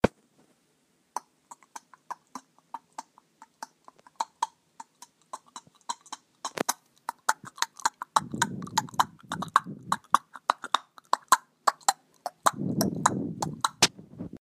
Horse walk with shoes
shoes, animal, horse